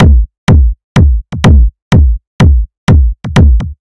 Kick Loop 2
A heavy dance kick drum loop.
[BPM: ]
[Root: ]